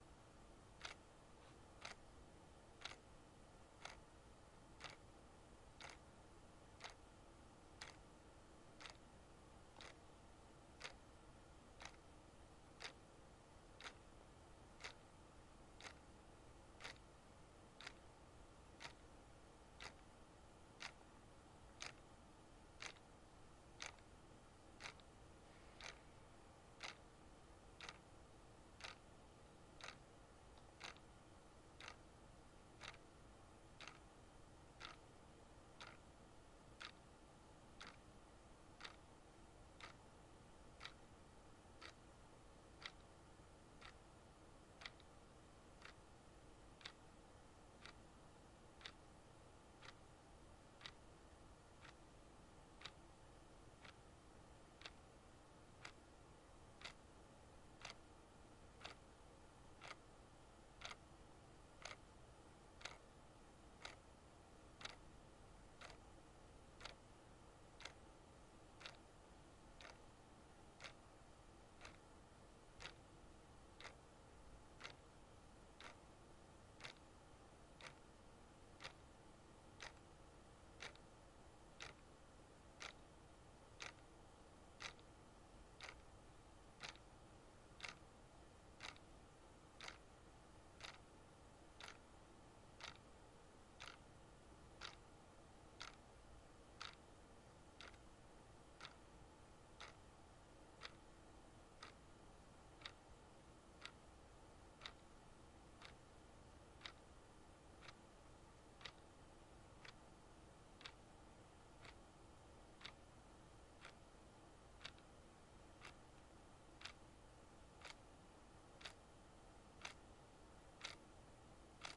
Clock Ticking
This is a recording of a clock from Ikea ticking.
clock, tick-tock, ikea, ticking